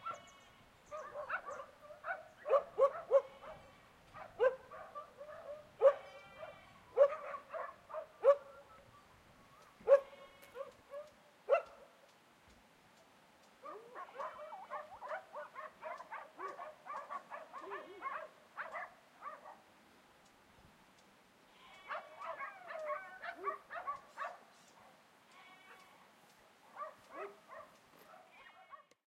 Alanis - Dogs Barking - Perros ladrando
Date: February 23rd, 2013
On our way to a Chapel, some dogs get mad and start barking.
Gear: Zoom H4N, windscreen
Fecha: 23 de febrero de 2013
De camino a una Ermita, algunos perros se vuelven locos y comienzan a ladrarnos.
Equipo: Zoom H4N, antiviento
grabacion-de-campo, Espana, perros, naturaleza, field-recording, barking, Sevilla, nature, Alanis, Spain, ladrando, dogs